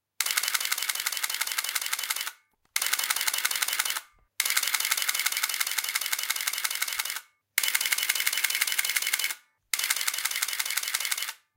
Burst Shutter SLR Film Camera Nikon F4
Olympus LS-P2 PCM Recorder